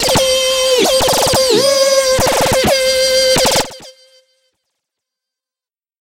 THE REAL VIRUS 13. - GUNLEAD C6
A lead sound with some heavy gun fire effect. All done on my Virus TI. Sequencing done within Cubase 5, audio editing within Wavelab 6.
lead, multisample